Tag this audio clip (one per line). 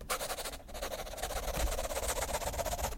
paper
scribbling